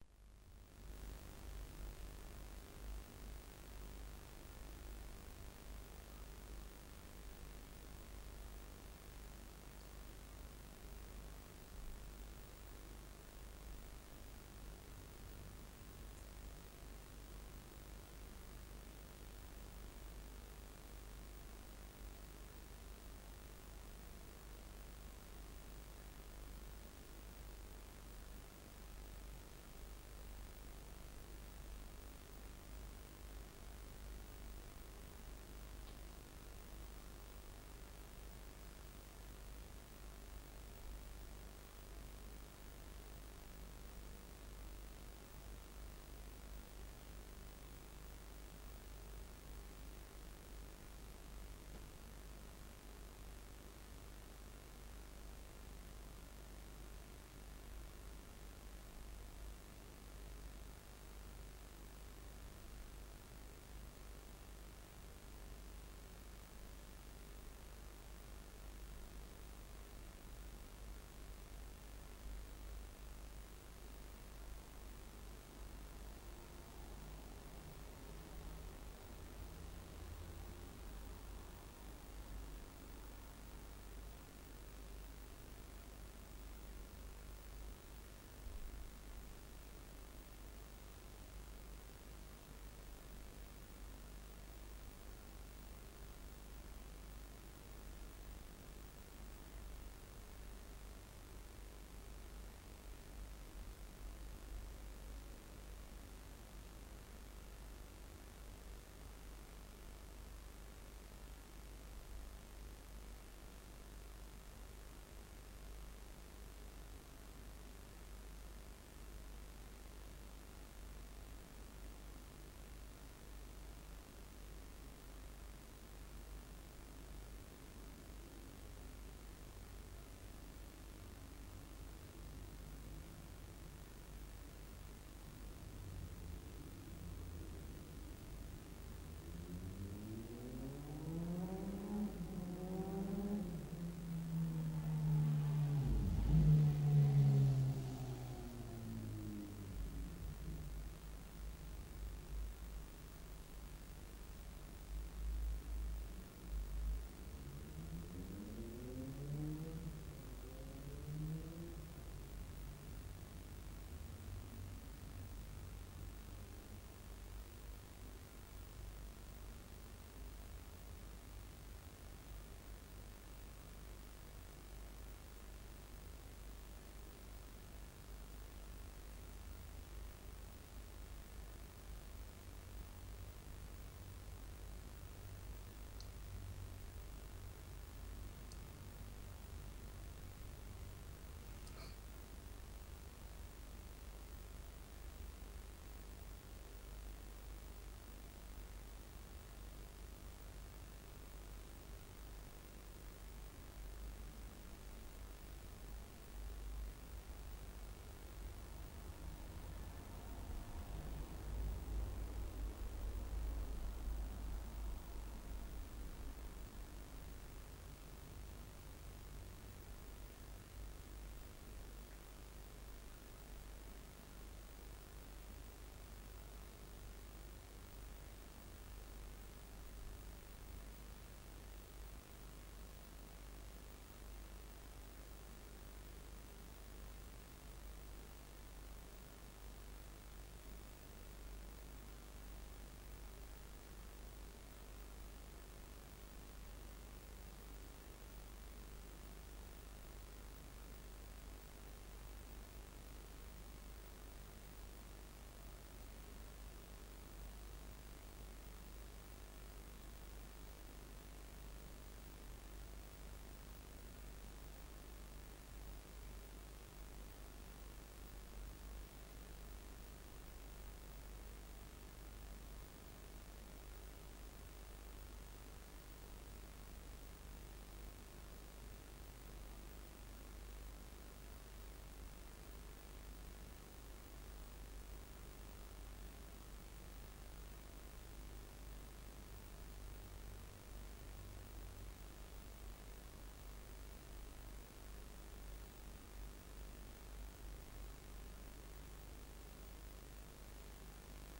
ECU-(A-XX)148 phase1
Analogic, ATV, Battery, Beam, Broadband, Calculator, Channel, Curve, ECU, Field, Flow, Fraser, Iso, Jitter, Lens, Linear, Mirror, Networking, Path, Scalar, Solar, Symmetric, Trail, Unit, UTV, Wave, WideBand, Wireless